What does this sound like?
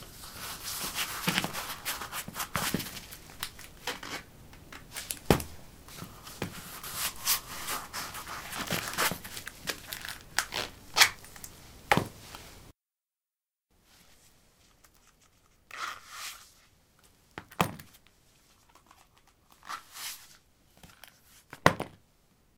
concrete 07d leathersandals onoff
Putting leather sandals on/off on concrete. Recorded with a ZOOM H2 in a basement of a house, normalized with Audacity.
footstep, step, footsteps, steps